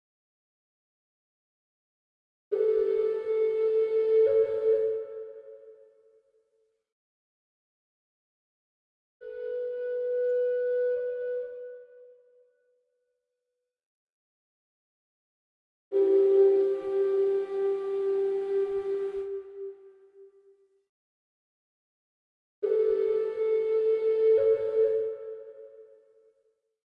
Laba Daba Dub (Flute)
Roots Rasta DuB
Rasta, Roots, DuB